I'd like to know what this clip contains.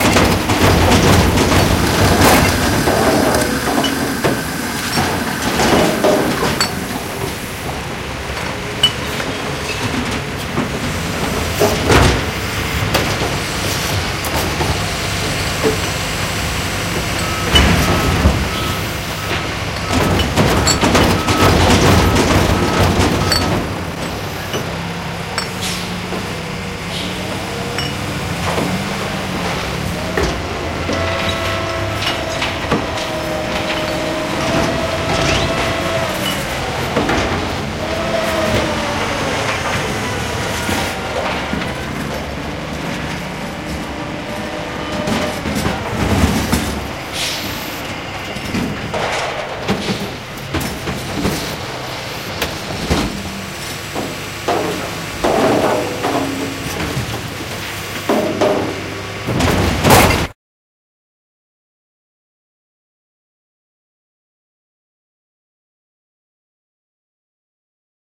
trash sound polution